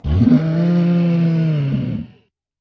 Monster wail 2
A monster wailing.
Source material recorded with either a RØDE Nt-2A or AKG D5S.
sfx, Monster, creepy, spooky, haunted, scary, growl, monsters, roar, wheezing, wail, beast, scream, horror, terror